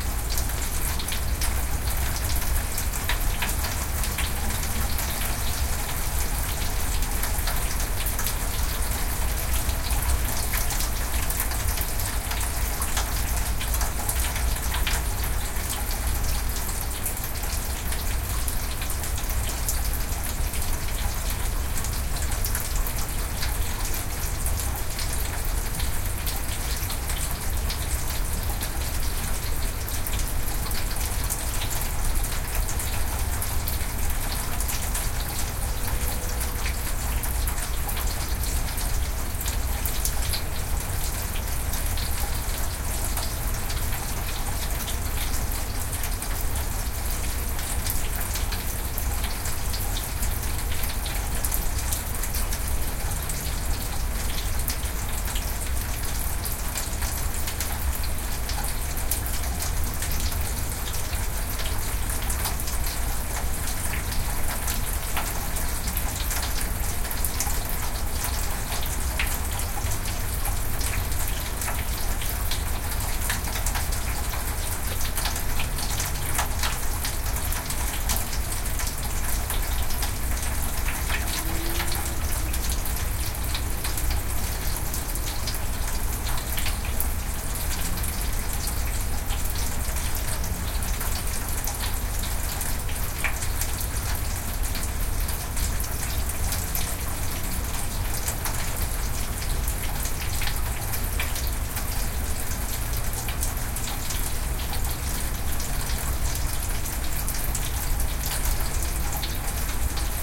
There was medium rain on Long Island, NY. I put my r-09hr on my porch with a windshield and pressed record. Edited to be a seamless loop